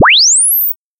Sine wave sweep from 0 Hertz to 22.5 kilohertz generated in Audacity.